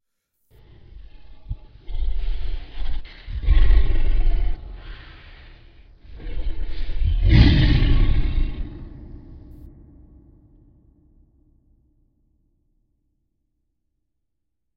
Kong Roar complete
A monster roar I was inspired to do after watching the new Kong movie.
Scary, Slow, Fantasy, Alien, Monster, Large, Snarl, Creature, Horror, Kong, Roar, Zombie, Scream, Growl, Animal